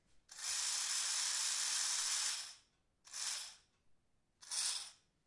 milk frother 2012-1-4
This is what a milk frother sounds like, when activated in the air. Zoom H2.